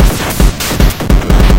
150bpm.PCMCore Chipbreak 5
Breakbeats HardPCM videogames' sounds